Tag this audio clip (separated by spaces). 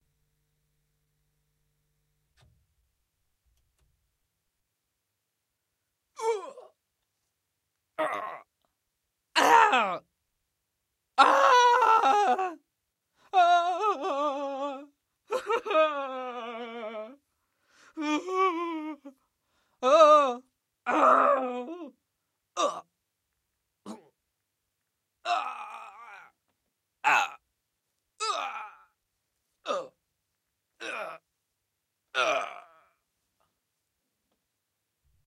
fear agony screams recording